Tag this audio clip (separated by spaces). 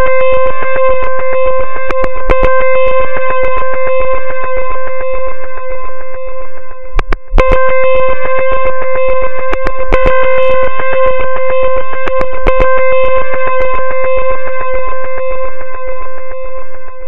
electronic fubar noise processed